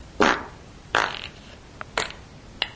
4 lil farts
car, beat, flatulence, snore, poot, laser, noise, aliens, flatulation, ship, gas, frog, frogs, race, fart, space, weird, explosion, nascar, computer